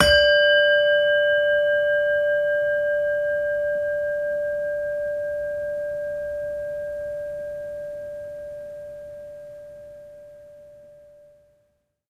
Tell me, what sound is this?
Medieval Bell D3
Medieval bell set built by Nemky & Metzler in Germany. In the middle ages the bells played with a hammer were called a cymbala.
Recorded with Zoom H2.
bell, cymbala, medieval